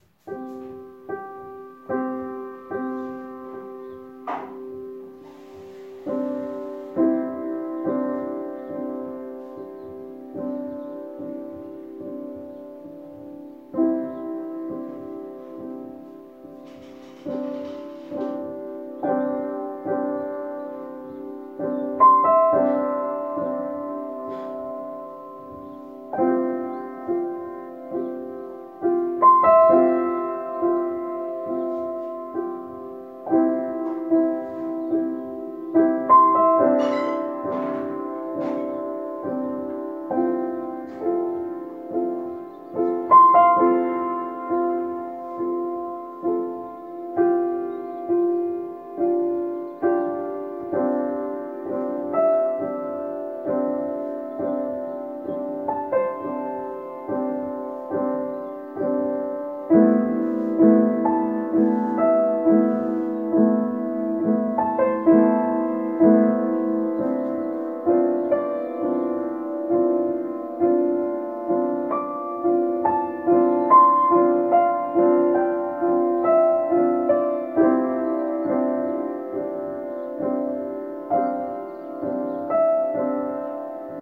piano jam-004
Playing a Pleyel piano, with some background noise
acoustic, piano, pleyel